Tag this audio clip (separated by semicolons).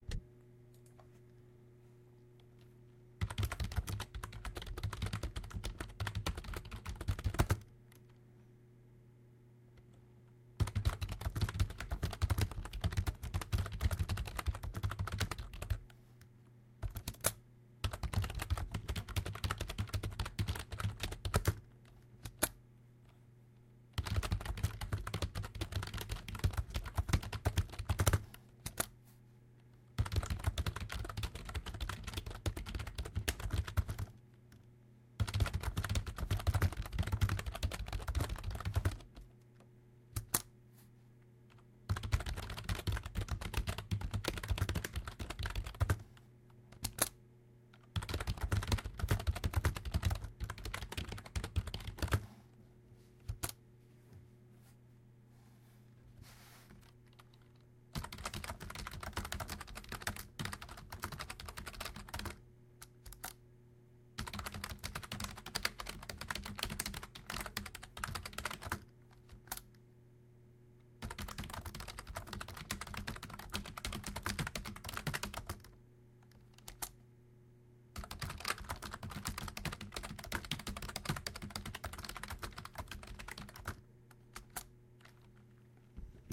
Office; PC; Typing